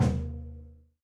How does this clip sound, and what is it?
a percussion sample from a recording session using Will Vinton's studio drum set.